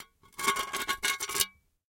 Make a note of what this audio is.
Small glass plates being scraped against each other. Rough, grating sound. Close miked with Rode NT-5s in X-Y configuration. Trimmed, DC removed, and normalized to -6 dB.
glass, grating, noisy, plate, rough, scrape